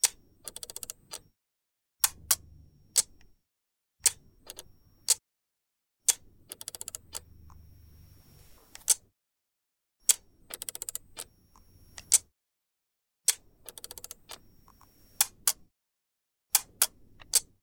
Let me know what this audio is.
This ambient sound effect was recorded with high quality sound equipment and comes from a sound library called Cameras which is pack of 100 high quality audio files. In this library you'll find shutter sounds of 20 different cameras along with other mechanical sounds, including flashes, rewinding film in analogue cameras and more.